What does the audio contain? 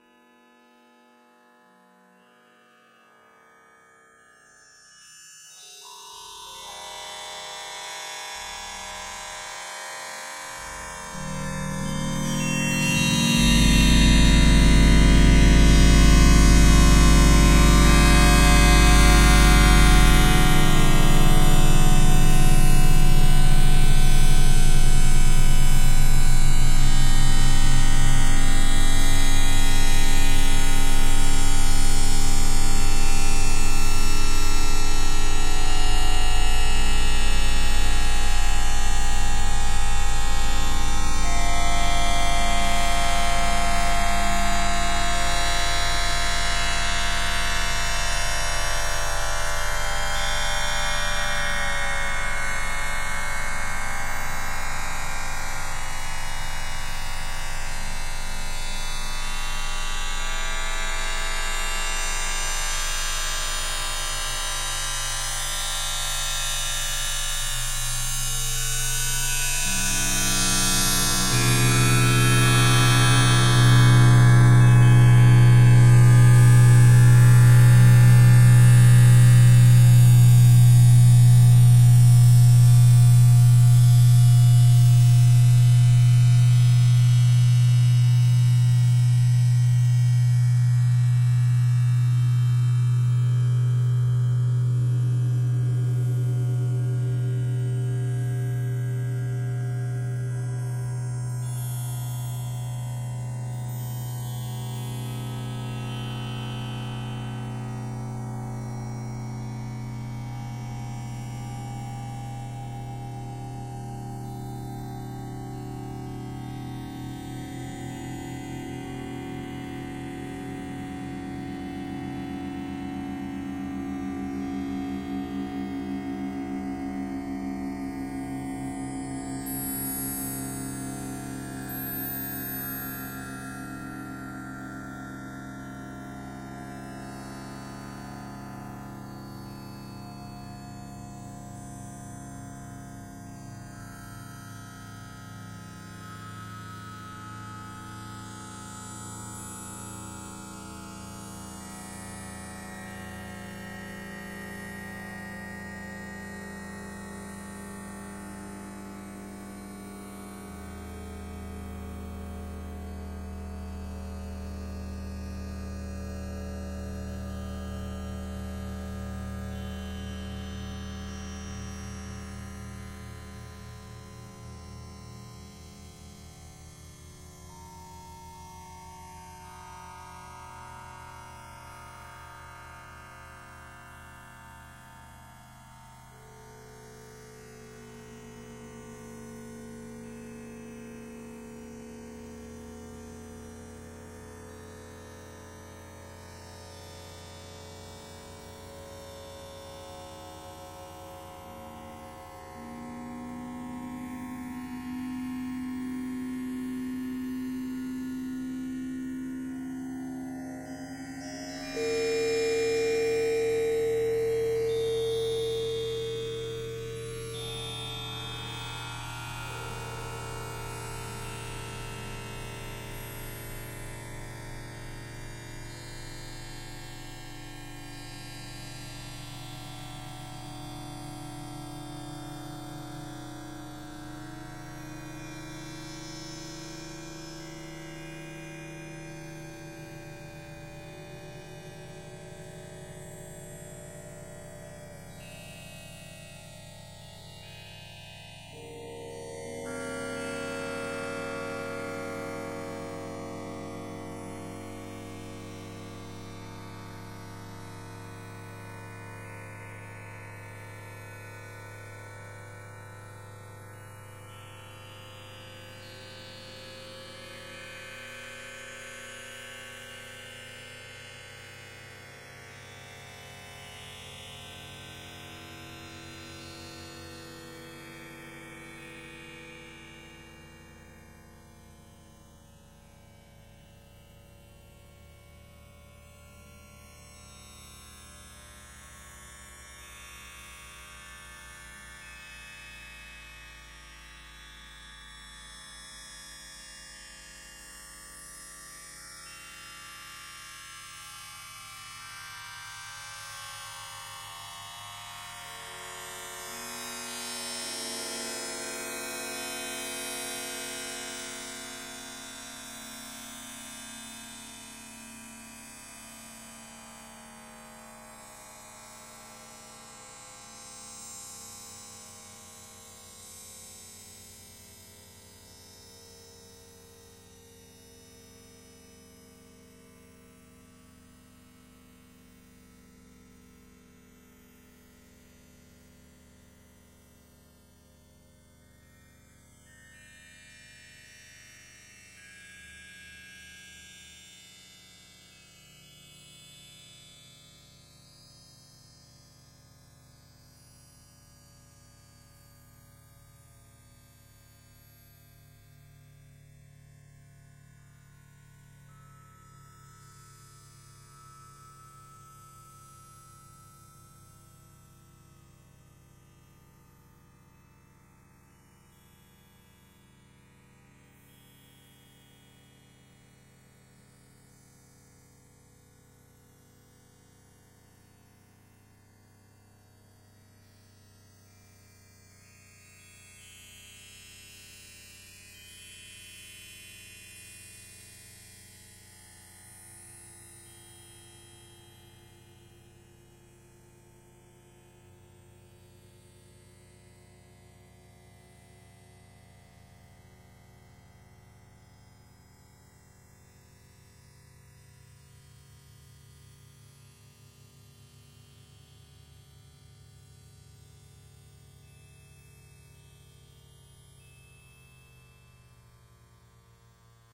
Water Splash Object 02 extreme time stretch
This is how a water splash would sound like, if individual details would be greatly elongated.
inharmonic, liquid, max, msp, sfx, slowed, splash, stretched, time-stretch, water